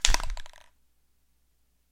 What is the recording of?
Shaking a spray paint can once